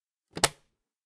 Closing a pelicans latch: closeup, single sound